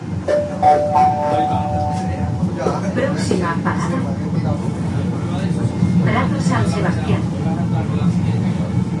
20091228.tram.interior
fragments of conversation inside tram, a synthetic bell, and a recorded voice announces last stop (Prado de San Sebastian, Seville). Olympus LS10 internal mics.